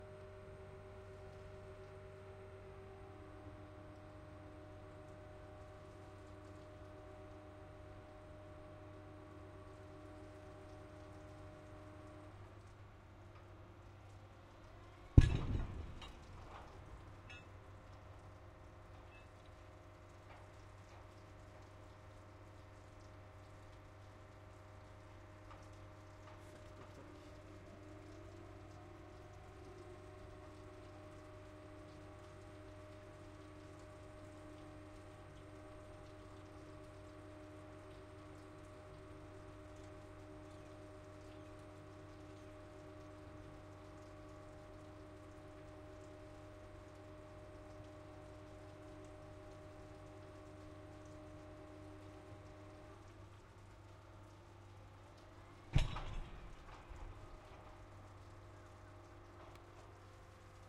A huge crane demolishing concrete by hauling several tons of iron high up in the air and then drop it.
Recorded of the deck of my boat with a Sony PCM M-10. Some seagulls and water contamination.